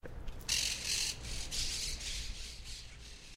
Sounds recorded by participants of the April 2013 workshop at Les Corts secondary school, Barcelona. This is a foley workshop, where participants record, edit and apply sounds to silent animations.
Short bird ambience
ambience, bird, foley, lescorts, short